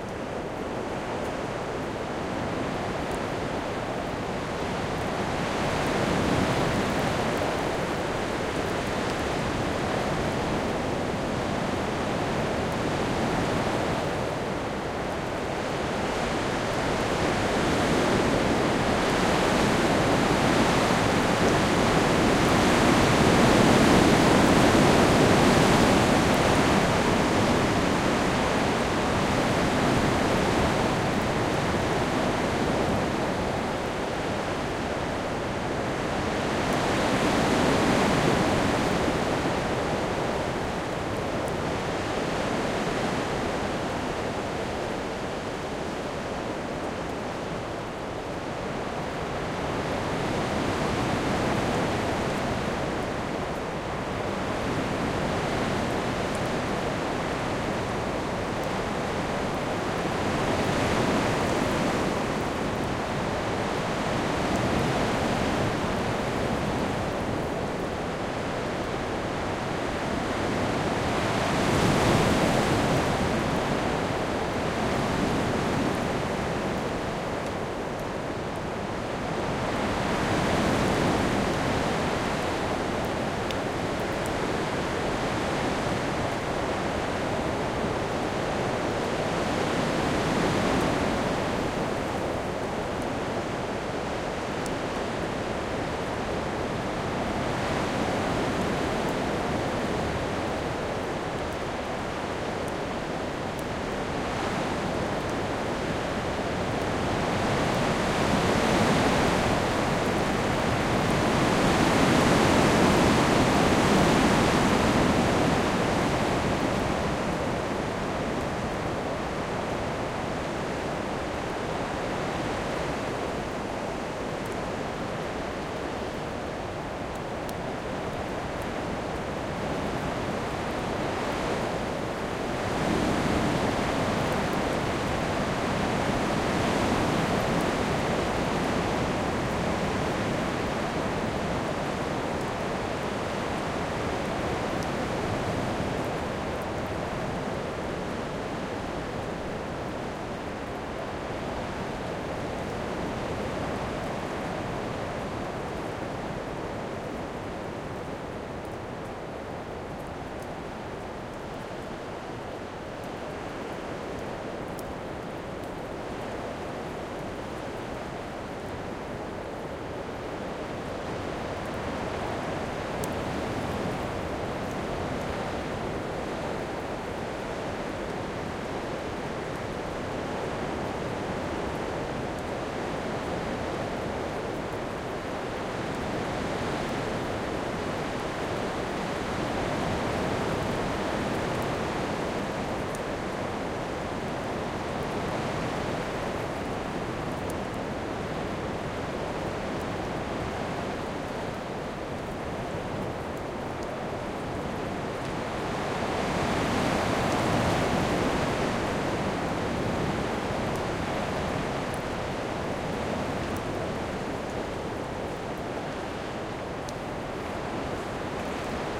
20091129.wind.pines
Strong wind (a gale, actually) passing through the leaves of a pine tree, and occasional raindrops. The tough leaves of this montane Pine species (Pinus pinaster) make a nearly solid noise, quite wild... Different of the soft murmur I most often record at warm sites by the sea (umbrella pines). This was registered near Puerto (Pass) del Boyar (Grazalema, S Spain) using a pair of Shure WL183 - with DIY windscreens - a Fel preamp and an Edirol R09 recorder
storm, tree, spain, pine, forest, wind, nature, field-recording